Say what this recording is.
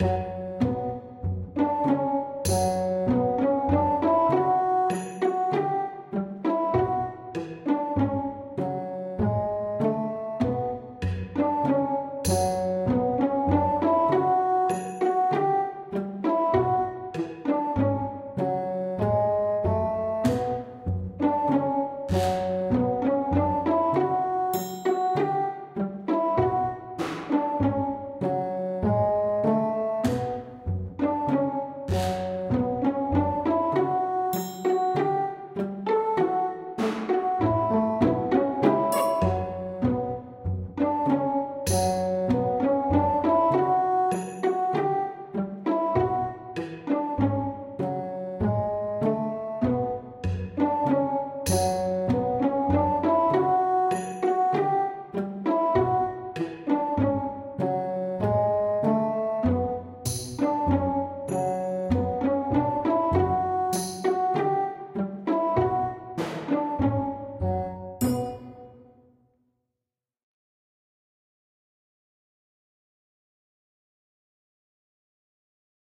Funny music (orchestra)
Here a background music made with Fl Studio 20 with these VST:
LABS spitfire audio (free)
VOC2 (free too)
Enjoy!